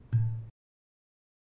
Cork bottle of win
bottle, cork, wine